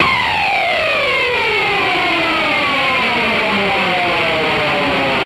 A short pickslide with Marshall-like amplification. Basic stuff... but here we go again.